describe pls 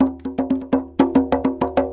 tambour djembe in french, recording for training rhythmic sample base music.